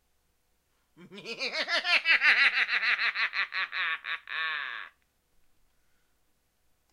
evil laugh-10
After making them ash up with Analogchill's Scream file i got bored and made this small pack of evil laughs.